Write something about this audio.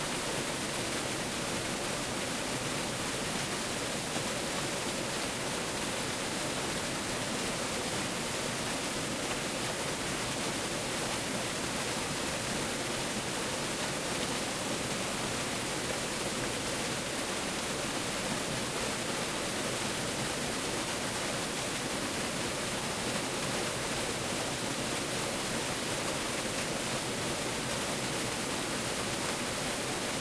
Waterfall mullertal (luxembourg) recorded with zoom iq6. Great for nature audio and video projects.
bridge, river, stream